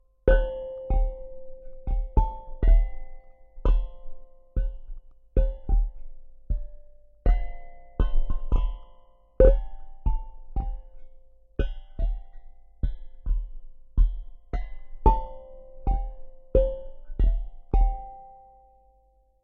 toy instrument recorded with contact microphones